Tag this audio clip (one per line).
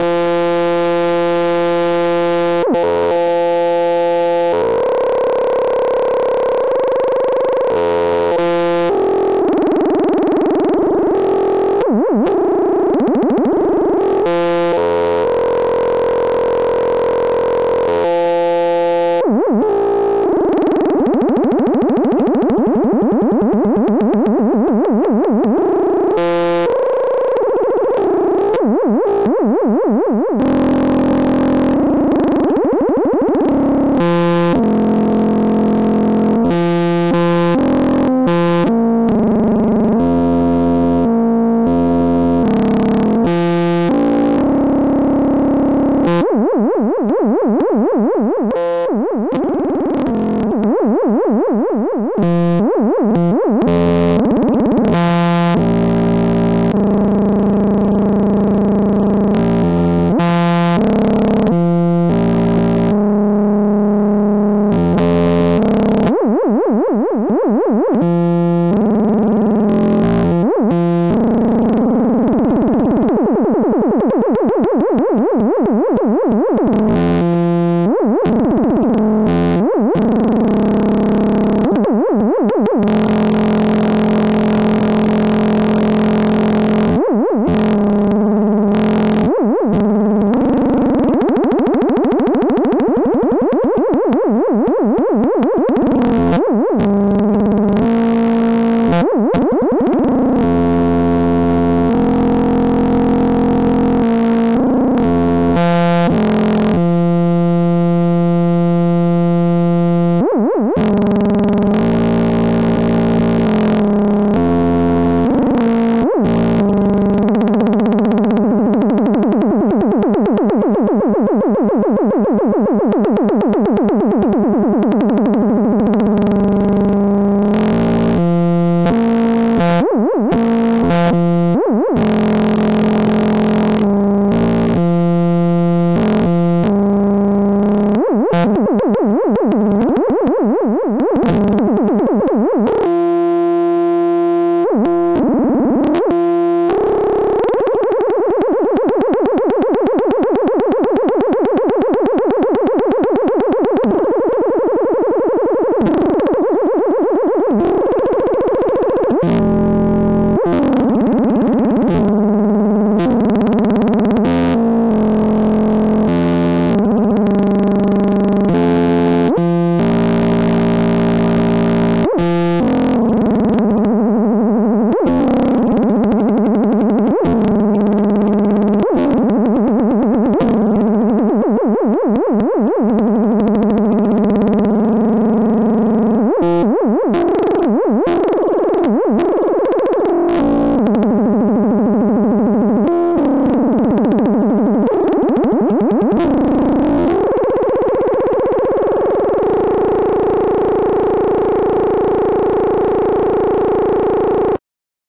experimental
annoying
alien
electronic
modulation
experiment
ambeint
robot
electric
laser
sound-design
space-war
random
blast
sweep
digital
space
signal
drone
computer
alarm
laboratory
sci-fi
damage